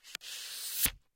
Queneau frot metal 24
prise de son de regle qui frotte
clang, cycle, frottement, metal, metallic, piezo, rattle, steel